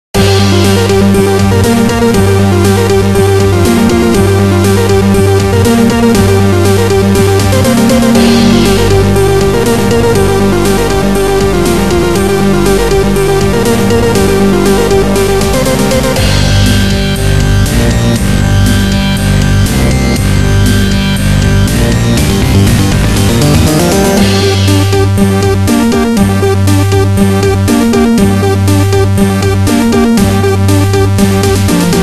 I used Rytmik Ultimate to make this song. The style is an up-beat, video game-ish kind of feel. I was inspired by games like Super C, Super Mario Bros, and Mega Man.